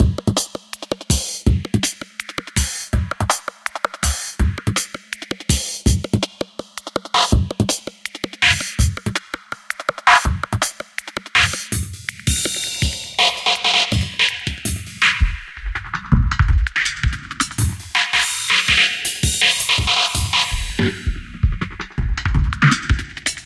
IjonTichy groover drum xp 1 dubreakfiller-rwrk
remix of "groover drum xp 1" added by IjonTichy (see remix link above)
some edits, accelerated, little touch of delay and reverb, phaser, gentle compression.
construction kit consist in 3 individual macro loops:
first - linear, second - syncopated, third - dubreak
beat, breakbeat, delay, dj, dnb, drum, drumandbass, dub, dubstep, effect, fill, filter, funky, groove, loop, percussive, processing, remix, reverb, rhythm, speed, syncopate